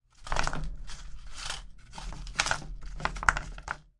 28-Objetos 6-consolidated
Objects on table
Things, Drop, Table